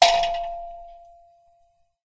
Bright Ping sound. Contact microphone recording with some EQ.
bell, pling, ring